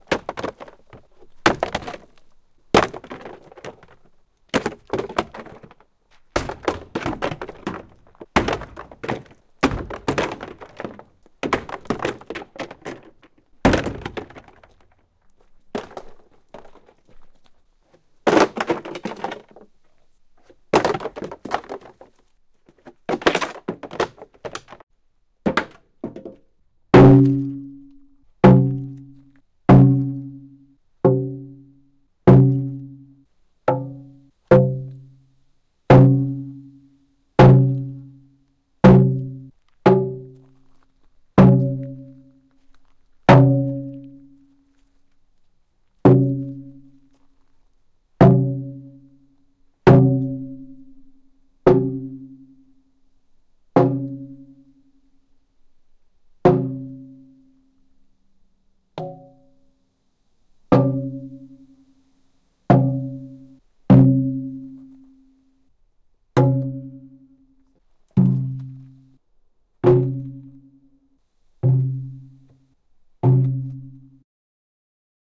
Bashes and clangs various
Various bashing and clanging elements for car crash effects.